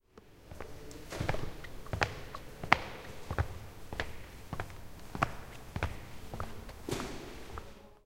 This sound contains the steps of someone walking through the library of the upf poblenou. The microphone followed the source and then, the distance between them remained constant. The recording was made with an Edirol R-09 HR portable recorder.